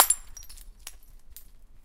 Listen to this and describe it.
Glass Shatter 1
A bright shattering with a few shards falling after.
Recorded with Zoom H1